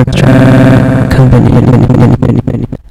Last night I finished these but actually i did them months and months ago... Pills.... ahh those damn little tablet that we think make everything O.K. But really painkillers only temporarily seperate that part of our body that feels from our nervous system... Is that really what you want to think ? Ahh. . Puppy love..... Last night was so...
kaoss, musik, noisy, processed, vocals, weird